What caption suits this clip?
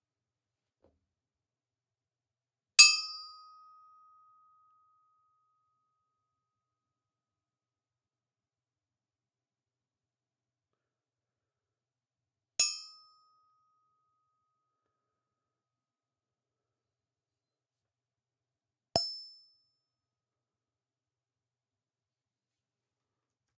hugh "click" sound with resonance
cink, click, impact